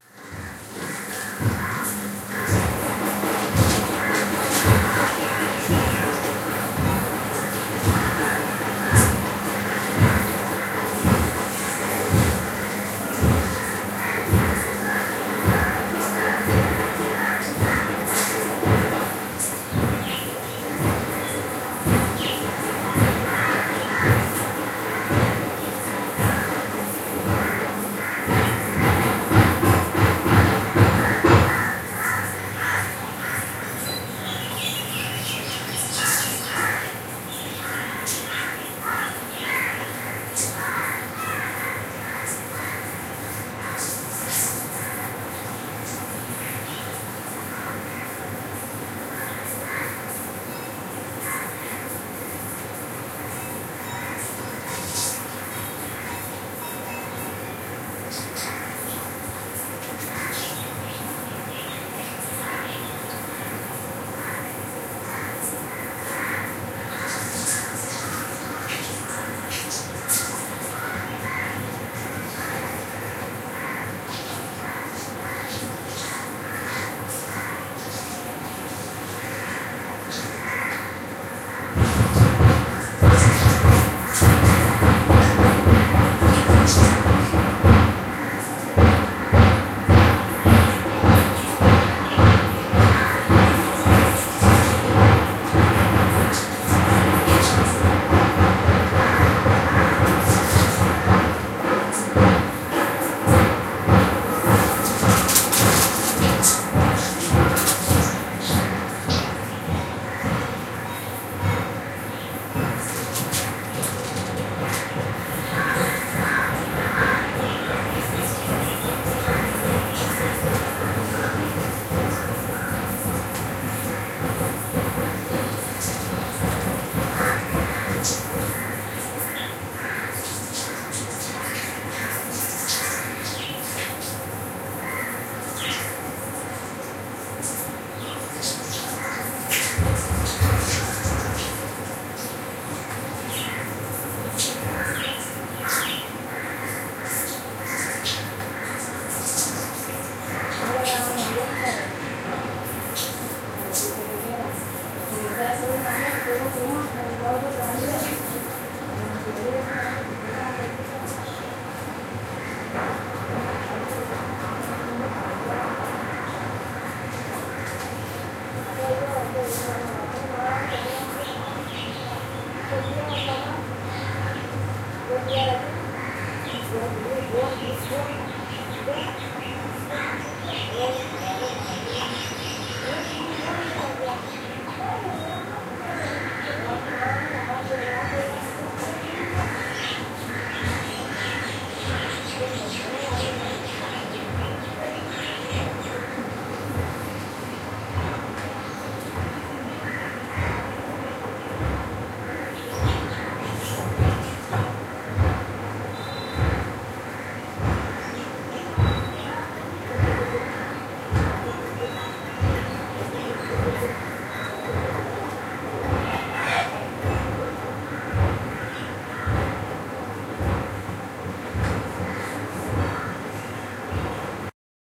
agonda:carnivalist passing out my house
field recording from Agonda